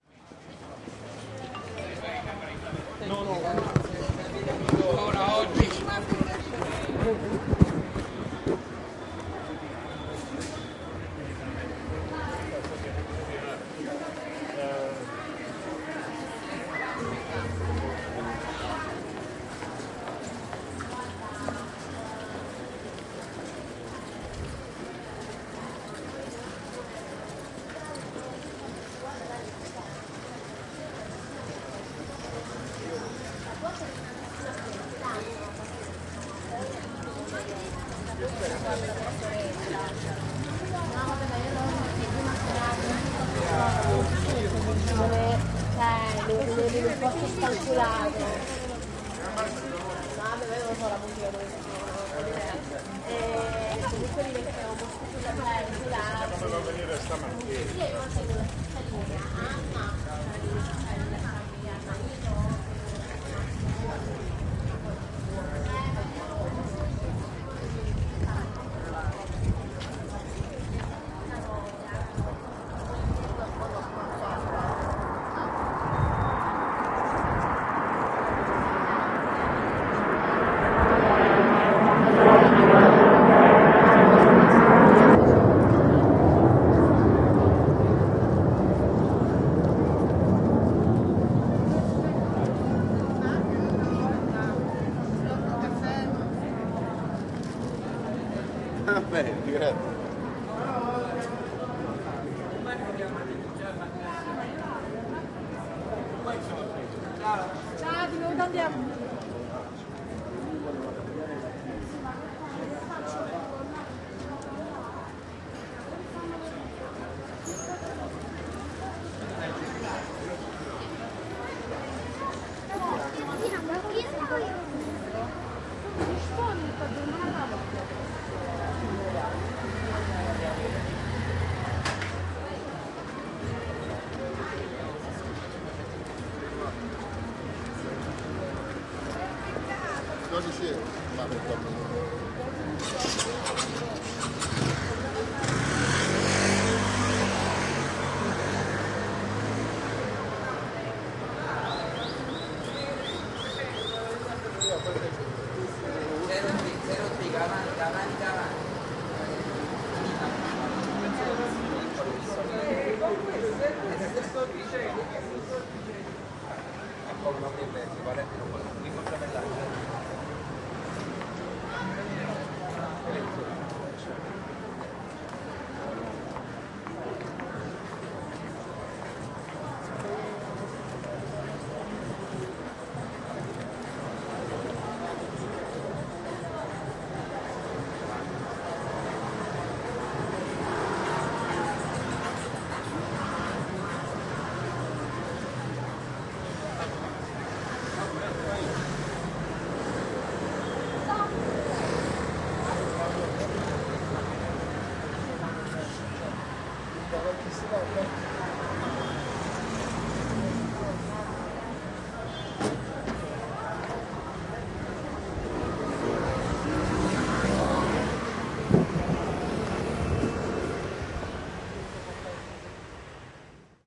Napoli Via NILO Backround
walking among people on Saturday morning before Easter in the middle of town.
(pedestrian island)
people, napoli